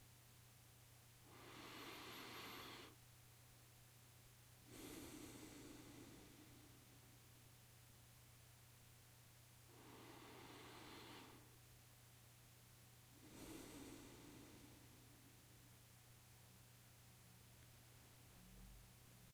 Recorded with Rode VideomicNTG. Raw so you can edit as you please. Calm breathing.